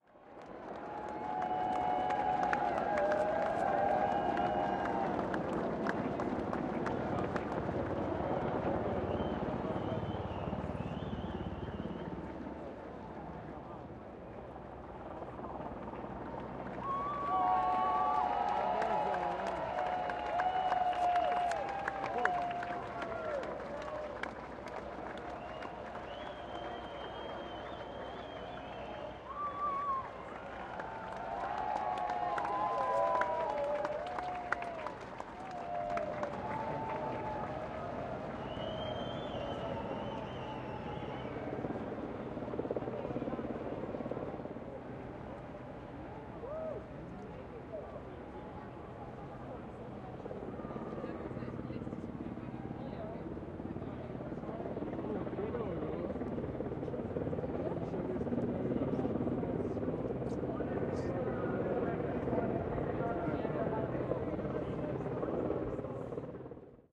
Recorded this at the London 2012 Olympics womens marathon near trafalgar square. Recorded on my ipod touch using a blue mikey mic with FiRe app. Unable to separate out the sound of the helicopters above but gives you the atmosphere.